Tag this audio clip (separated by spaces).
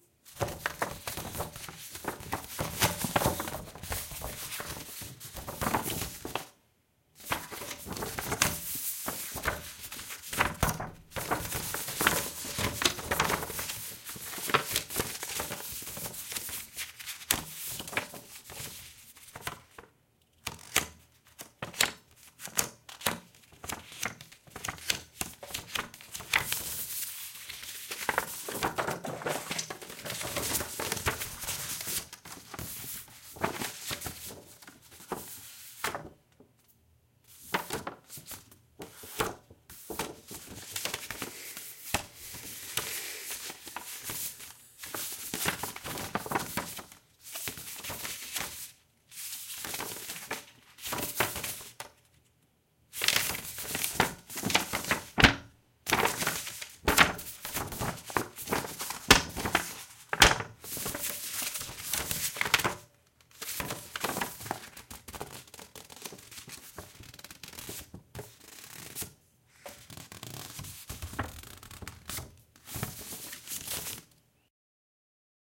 book gentle handling light page paper script